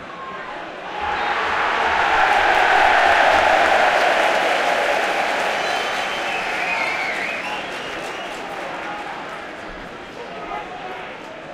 Football-match Cheering Large-crowd Ambience .stereo
Loud cheer at the start and falls quickly
Recording of the football game at Wimbledon Stadium, sitting in the upper stands, the cheering crowd is rather distant leading to some losses high frequencies
Recorded in stereo with spaced A/B Omni
cheers, crowd, fans, football, game, match, shouting, soccer